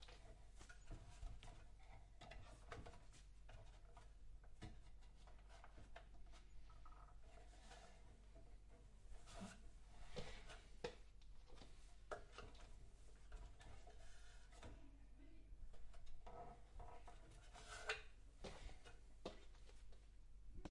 Climbing wooden ladder
A lighter weight male climbing a classic library wooden ladder, and the creaking that follows.
board, climbing, creak, creaking, ladder, movement, OWI, paced, plank, shift, steps, tempo, timber, wood, wooden